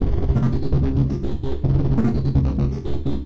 grossbass filtered pitch shift2
grossbass pitch shift2
bassloopsynth, sounds